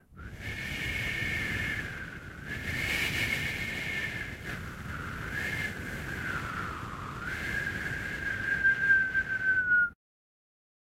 Wind Arctic Storm Breeze-005
Winter is coming and so i created some cold winterbreeze sounds. It's getting cold in here!